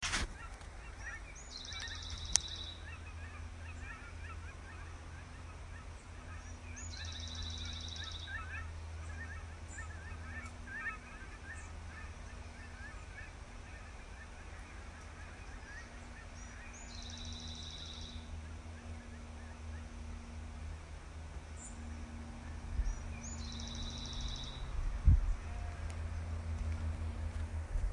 Goose traveling north 03/11/2019
Goose above my head traveling north.
bird, Goose, nature, migration, field-recording, birds, farm, heading-north